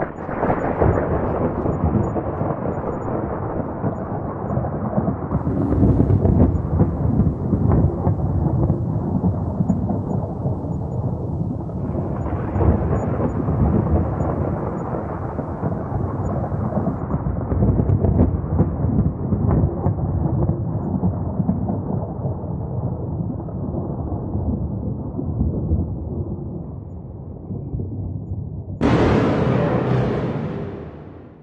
Thunder Sounds Long
Combined few single thunder sounds to create new long thunder sound clip.